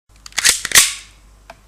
Pistol Gun Cock
Stinger P9 airsoft pistol cock.